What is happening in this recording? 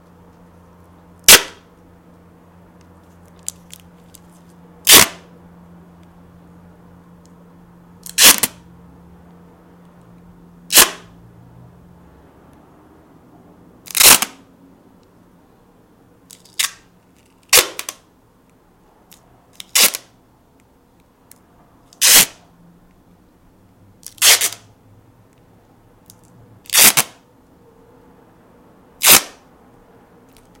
duct tape ripping
duct, rip, tape